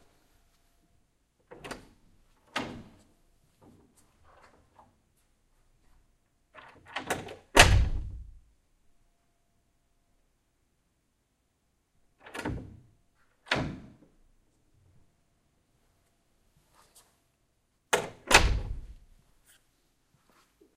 DoorOpenClose-EDITED
A few different times of opening and closing an office door.